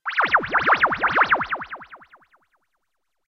Generic unspecific arftificial space sound effect that can be used in games to indicate something technologically laser beam related happened